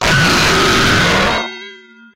HUGE AND LOUD Explosion
A SUPER loud explosion. Watch out for your ear drums!
lazer, explode, boom, missile, bang, explosion, bomb, laser, loud, gun